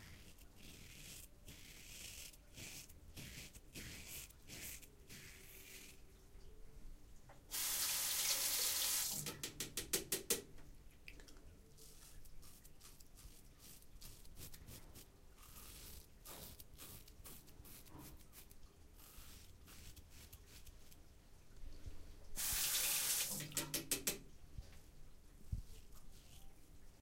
This sound is part of the sound creation that has to be done in the subject Sound Creation Lab in Pompeu Fabra university. It consists on the charateristic sound of a man shaving with a Gillette.
toilet, UPF-CS14